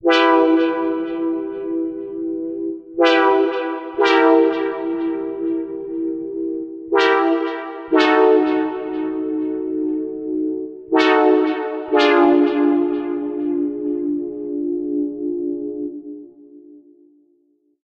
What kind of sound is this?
Em Synth chord progression
120 BPM midi synth chords in E-Minor, 8 measures and loop-able.
midi, Chords, chordprogression, Eminor, Synth, Progression, 120bpm